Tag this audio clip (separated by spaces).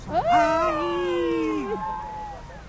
amazement exclamation field-recording voice wonder